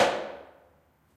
I stomped my feet in a concrete stairwell.
foot, hit, reverb